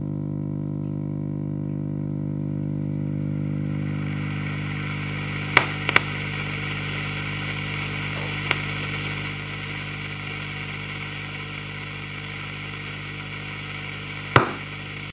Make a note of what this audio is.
humming noise and other noises made by my vintage Telefunken valve radio.